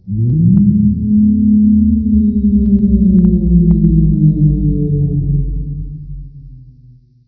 Intimidating Dogscape Howl
Dog, Dogscape, Horror, Howl, Scary, Spooky, Wolf